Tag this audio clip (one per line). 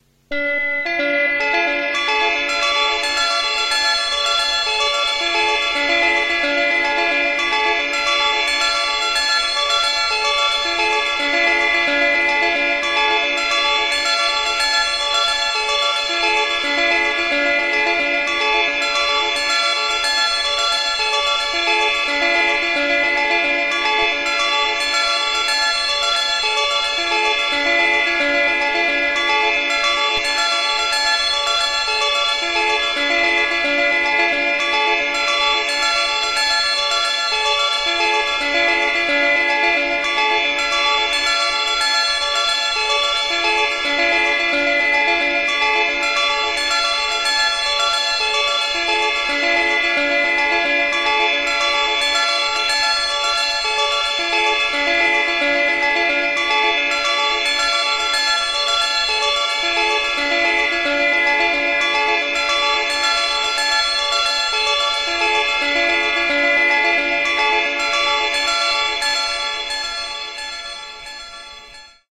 electronic
experimental
downtempo
everything
drugs
acid
ambient
synth
chill
universe
life
instrumental
nothing
drone
loops